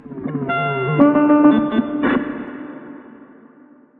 CASIO SA-5 Glitch 1
My beloved Casio SA-5 (R.I.P - burned during duty) after circuit bend. All the sounds in this pack are random noises (Glitches) after touching a certain point on the electrical circuit.
Casio, sa-5, glitch, synth, random, circuit, bend, circuit-bend
circuit, random, synth, circuit-bend, bend, Casio, glitch, sa-5